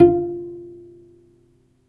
A pizzicato multisample note from my cello. The sample set ranges from C2 to C5, more or less the whole range of a normal cello, following the notes of a C scale. The filename will tell you which note is which. The cello was recorded with the Zoom H4 on-board mics.
acoustic, cello, multisample, pizzicato, pluck, strings, zoom